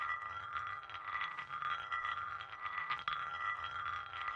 stone mechanisem loop 02
Marble rolling on a stone plate.
Ball, Grinding, Loop, Rolling, Stone